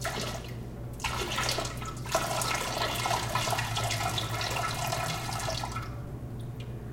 Bathroom Pee
toilet, wc